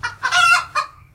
Chicken Single Alarm Call
The alarm call of one of our chickens after she spotted a cat in our garden. This sound is taken from my other recording of the same event. The original (longer) version is also available.
Recorded with a Samson Q7 microphone through a Phonic AM85 analogue mixer.
hens, call, bird, hen, chick, cackle, animal, clucking, farm